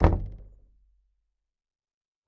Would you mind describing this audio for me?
Door Knock - 45
Knocking, tapping, and hitting closed wooden door. Recorded on Zoom ZH1, denoised with iZotope RX.
percussive, bang, knock, door, closed, hit, tap, percussion, wooden, wood